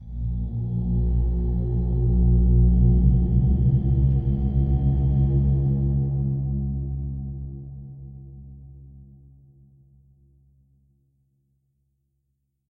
The Fighting Machine Spouting Red Weed with a growl like sound
alien, machine, sci-fi, siren, Waroftheworlds